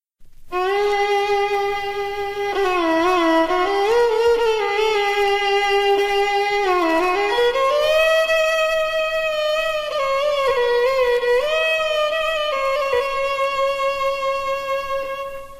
violin & back ward metal plates